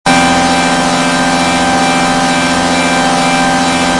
One hit synth in massive. For His Sake.